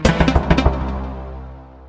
I made these sounds in the freeware midi composing studio nanostudio you should try nanostudio and i used ocenaudio for additional editing also freeware
application, bleep, blip, bootup, click, clicks, desktop, effect, event, game, intro, intros, sfx, sound, startup